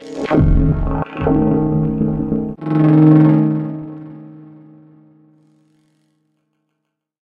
NAP CRAZY HARP LOOP reakted
field-recording, home-studio, sample